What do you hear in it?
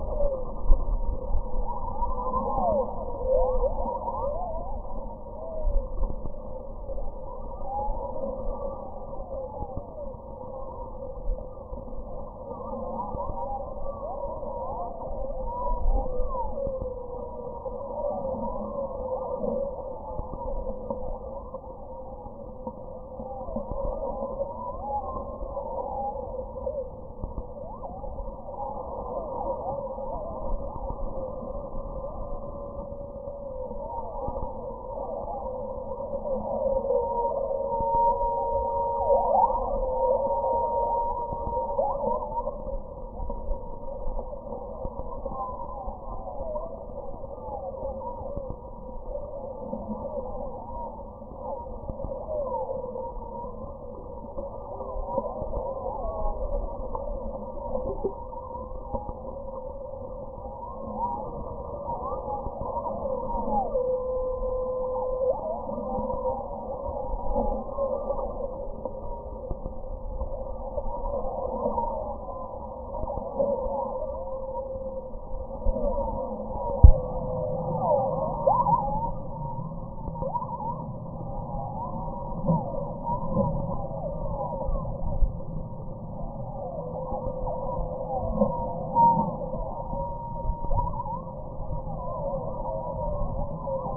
electronic generated sonic object non human female voices modulations sample 1
electronic algorithmic sonic objects
non-human-voices-modulation, female-voices, electronic-sound